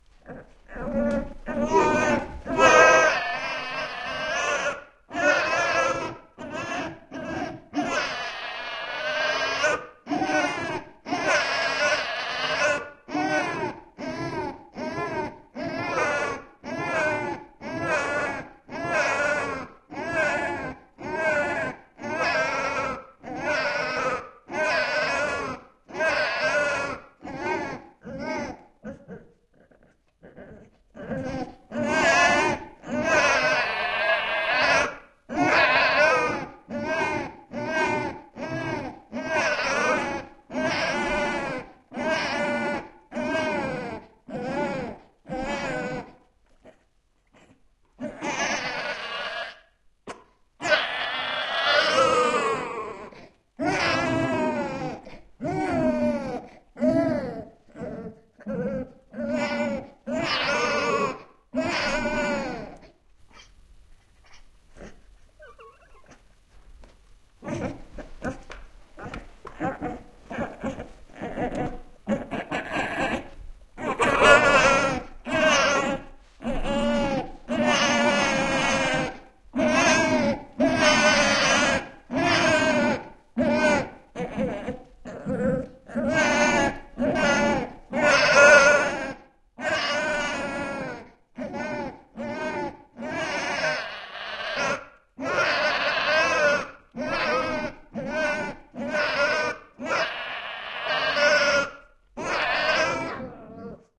Demon Baby Cry 01 Mixdown 1
This is a sound I made in Audition with the use of pitch bending and some muddling techniques. It was also crafted from: